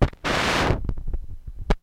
The sound of the stylus jumping past the groove hitting the label at the center of the disc.